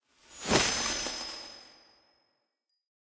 Magic spell (small positive)
'positive' magic spell sound design (not evil).
design, magic, sound, wand